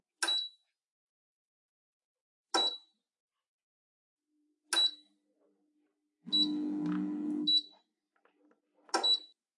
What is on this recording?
Switch + beep kitchen hood
Touch screen controls with beeps on an electronically controlled kitchen hood. Short, clicky sound with high-pitched beep.